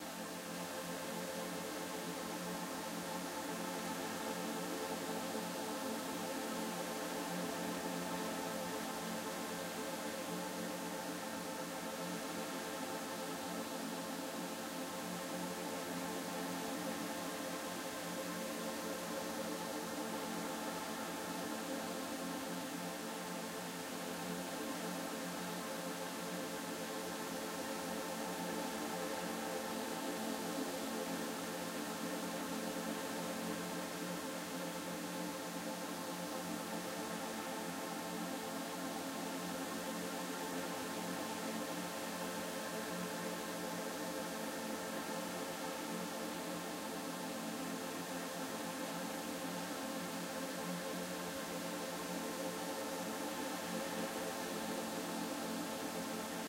Fmaj-calm noise
Pad, created for my album "Life in the Troposphere".